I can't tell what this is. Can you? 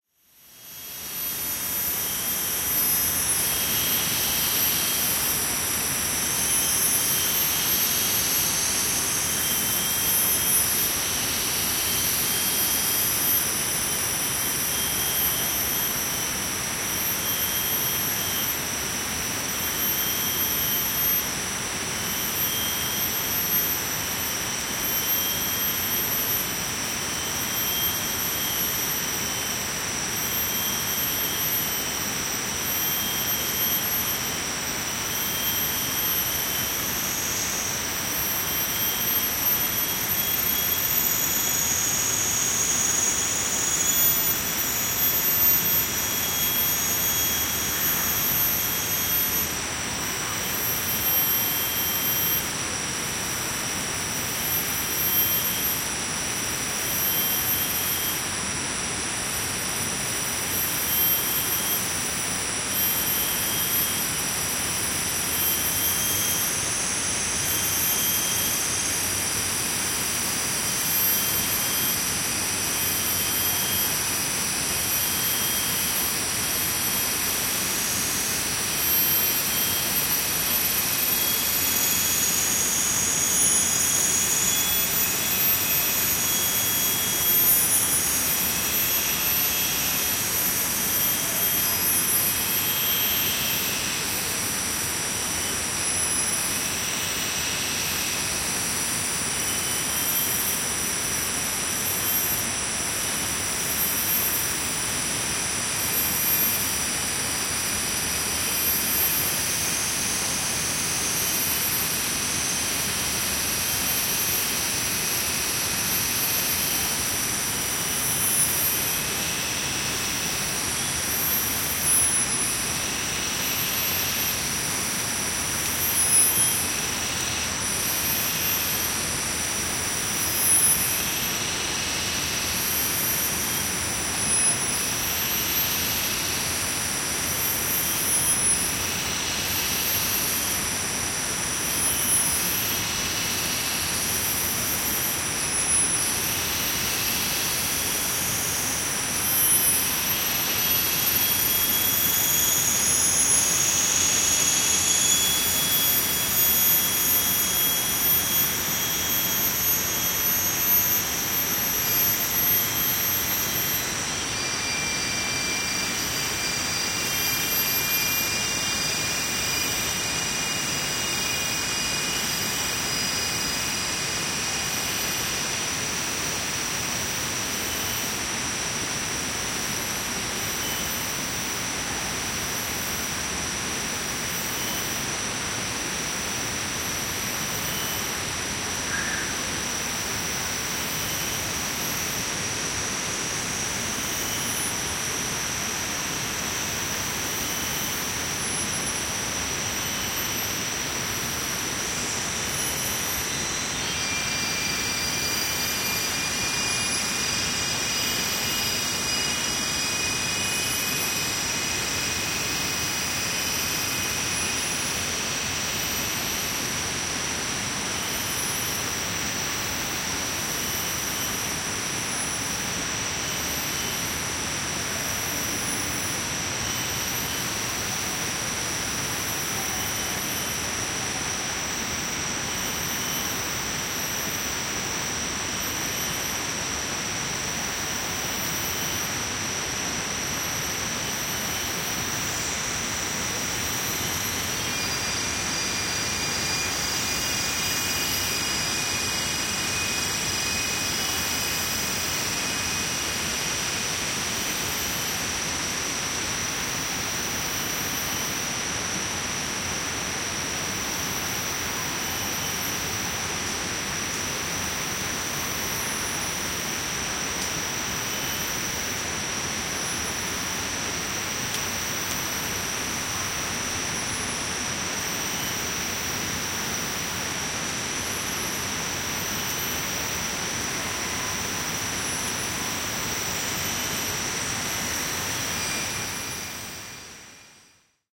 Malesia, sademetsä, viidakko / Malaysia, rainforest, jungle, noisy crickets, waterfall in the bg
Sirkat sirittävät voimakkaasti, välillä vihlovaa siritystä, vesiputous kohisee taustalla.
Paikka/Place: Temple Park -luonnonpuisto / Temple Park, Nature reserve
Aika/Date: 11.05.1991
Aasia, Asia, Field-Rrecording, Finnish-Broadcasting-Company, Soundfx, Tehosteet, Yle, Yleisradio